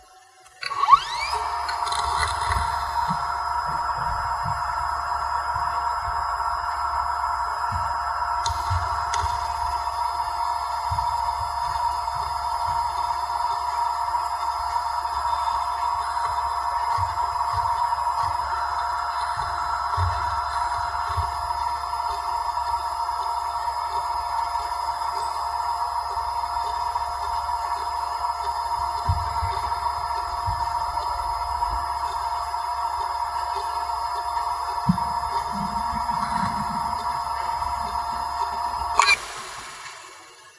Hitachi TS 4K40 - 4200rpm - FDB
A Hitachi hard drive manufactured in 2004 close up; spin up, writing, spin down.
(hitachi travelstar hts424040M9AT00)
hdd, motor, machine, rattle, disk, hard, drive